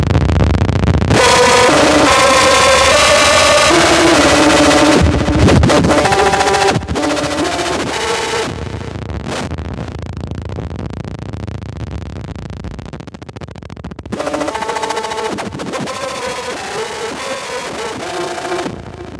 This melody never had a chance. Made in Ableton 9 by Healey.